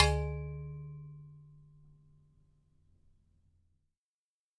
Bell-like resonance with few overtones